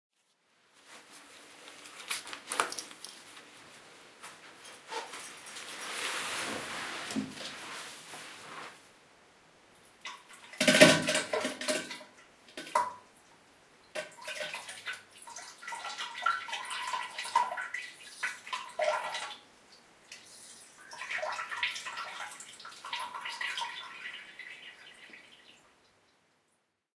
Diarrhea Sounds
Doing number 2 in the toilet, my current medication gives me this. Recorded with CanonLegria.